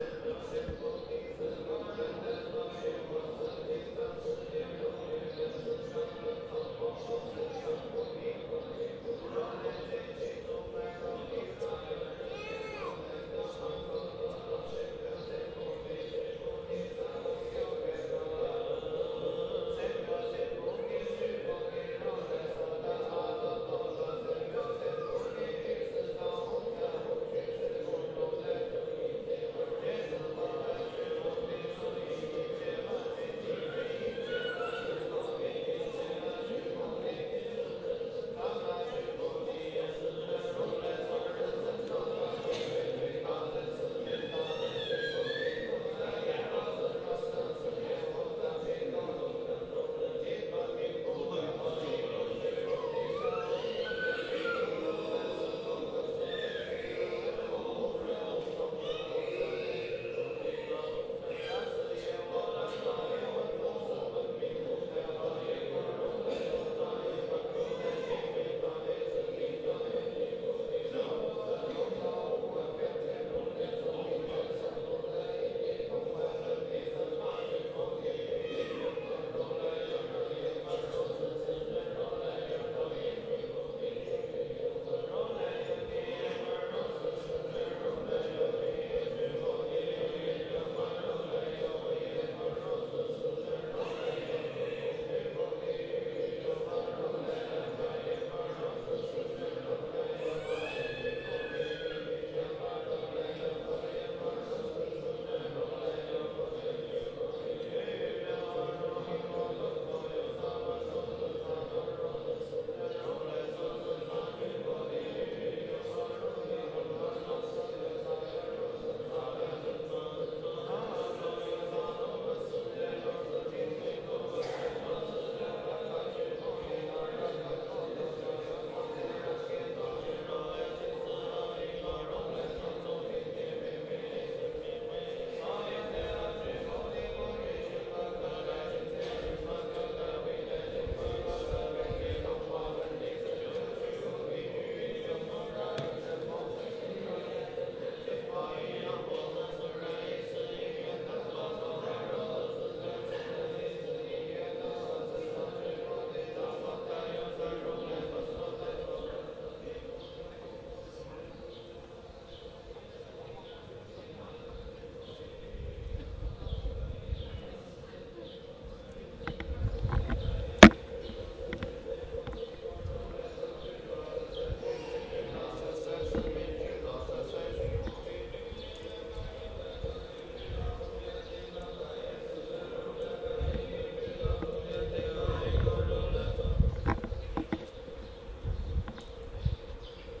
Temple monks chanting01寺庙和尚诵经
The temple is located in Shanghai Jinshan
Temple, ambiance, chanting, field-recording, monks